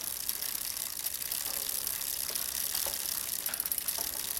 bike forward
Pushing on a bicycle pedal